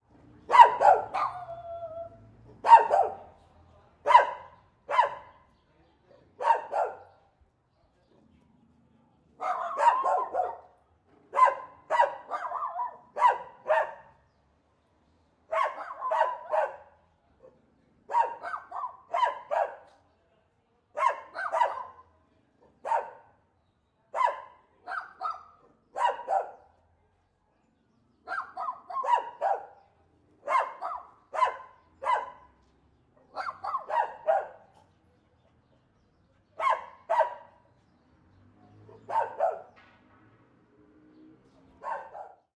Amb:Dogs:Street:Bark
This audio was recorder with a ZOOM F4 + MKH 416, for a mexican documental of the virgin of Zapopan, in GDL,Jalisco.Mex.